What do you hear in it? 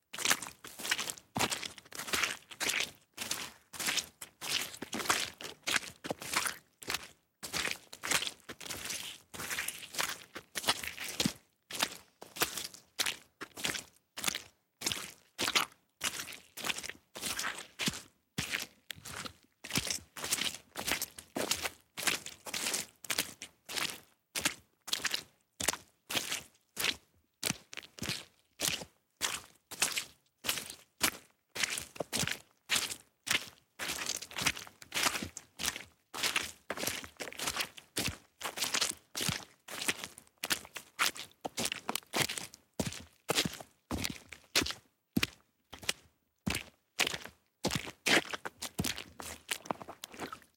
footsteps-mud
field-recording, footsteps